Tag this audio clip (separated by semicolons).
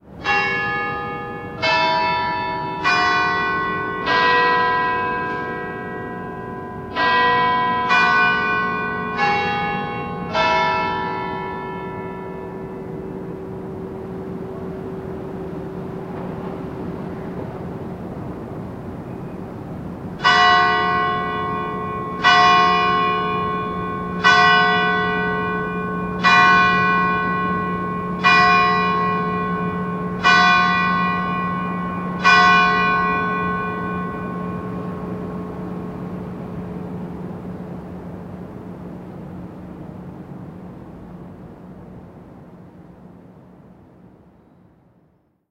church; bell; environmental-sounds-research